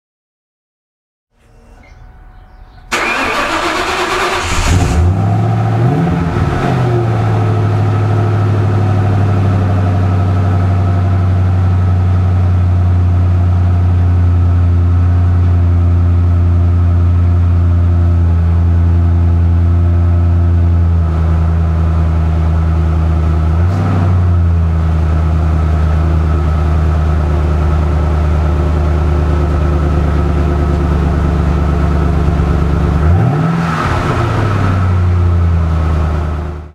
I recorded this sound of a stock, 2004 Mustang Cobra SVT Staring. It was done inside my garage with a rode mic.